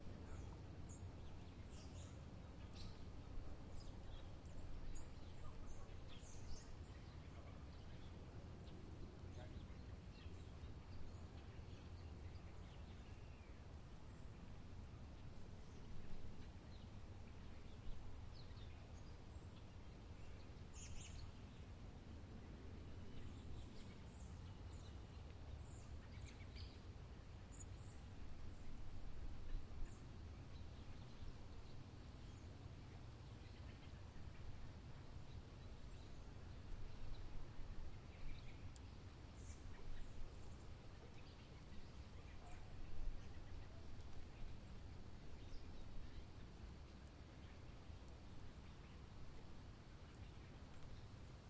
LR FRONT QC MARSH TOWN BG SUMMER
Summertime ambience recorded in a marshland in Quebec - Montreal suburb.This is the front pair of a 4channel recording made on an H2.
suburban, marsh